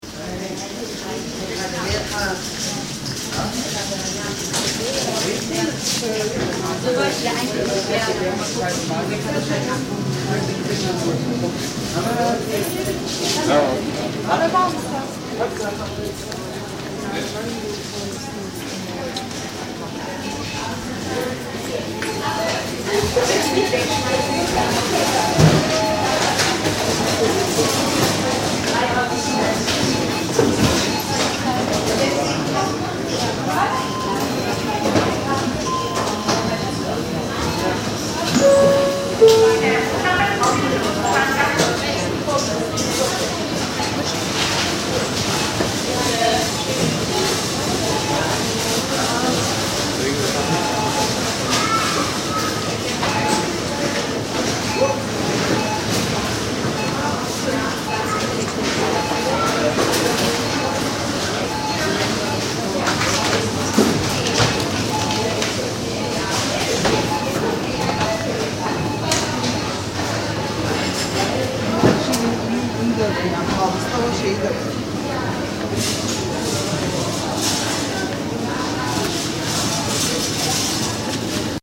Supermarket-02-mono
the sound of a supermarket
babel, cashout, checkout, counter, german, indoor, supermarket, voices